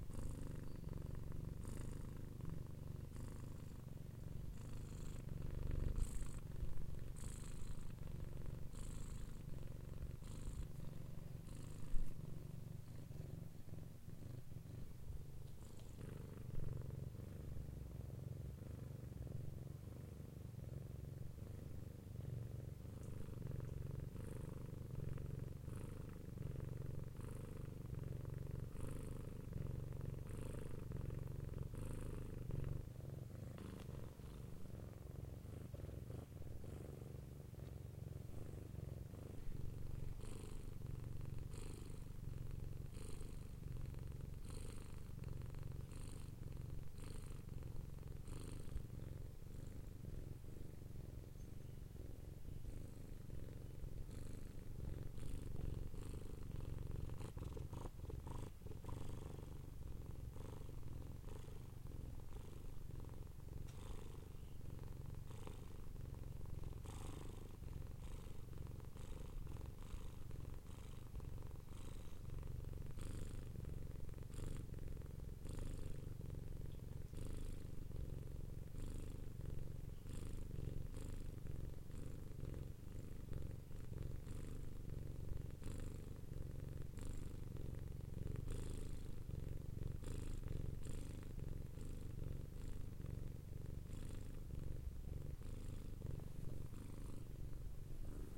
Cat purring record in mono with a Zoom